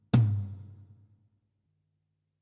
Drum Hit 2 FF037
1 quick beat on a drum. Low pitch, minimal reverberation. muted
drum, drum-beat